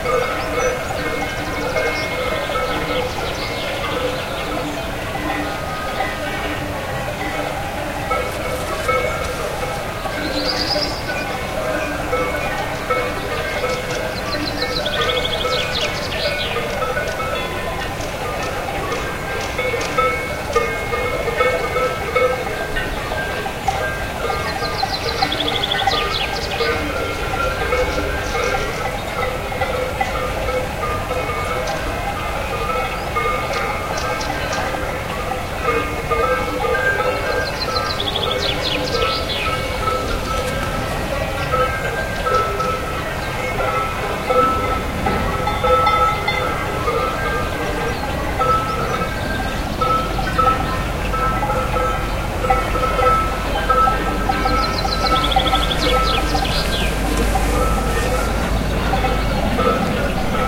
Several different Cowbells recorded outdoor, Birds chirping, countryside athmosphere. Recorded with a Sony-Fieldrecorder, built-in microphones. Mörlialp, Switzerland,
Athmosphere, Bird, Cowbell, Nature, Outdoor, countryside